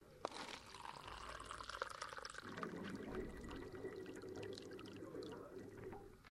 Remainder of the water drained through a plug hole of a sink, close mic, no ambience.
bathroom, bowl, drain, hole, pipe, sink, toilet, unprocessed, water, wc